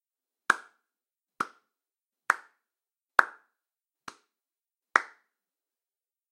Slow Clap Alone
A slow clap by one guy only alone.
alone, applause, boring, clap, lame, slow, slow-clap